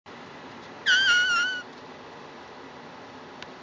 This is my impression of a flatulent arachnid, or "spider fart" which if referenced by a woman in an old-timey southern mansion, might be pronounced as "spidah-faht".
This seemingly odd spelling and like-wise pronunciation, perhaps worthy of explanation, would be on account of their "...well known and often satirized dropping of "R's" in times when they precede another consonant or pause, which has the effect of elongating the pronounciation of the vowel before it." as Ray Kooyenga explains it.
Delving deeper, according to j. fought who seems to agree, this was a originally a "southern english dialect associated with priveledge and prestigem" and in certain geographies of the Southern and South Eastern United States migrants "clung to such speech through its association with the influential proprietors of the Southern plantation agricultural system."
The use in "faht" has also another common southern linguistic trait of what the preceding gentleman might term a "Confederate A".